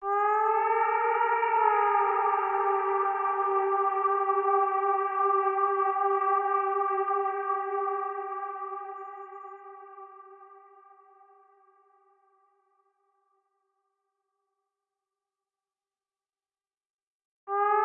Created with Zebra2